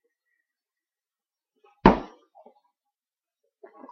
Foley- Stomach-Punch
This is a decent sound effect for when a person punches the other persons stomach. Enjoy!